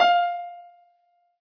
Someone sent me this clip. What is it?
Piano ff 057